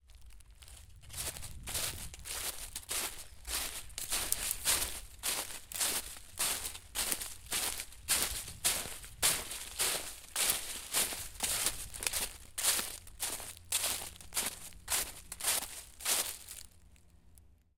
footsteps - leaves 01
Walking through leaves with the microphone held to my feet.
autumn, crunch, crunching, dry-leaves, feet, footsteps, leaves, outdoors, outside, steps, walk, walking